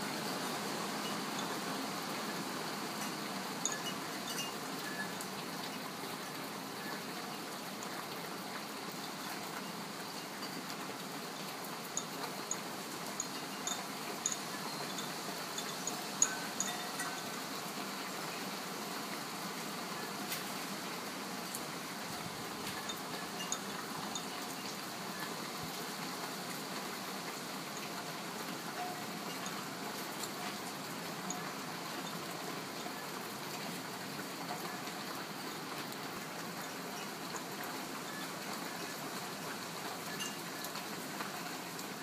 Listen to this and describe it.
Rain and Chimes (Inside)
Another recording from last night's rainstorm. This one I took from inside my house, after cracking the window open a little. Muffled a little, so one might think the sound was coming from outside.
Feedback always appreciated! Enjoy.
field-recording, wind, weather, rain, storm, wind-chimes, chime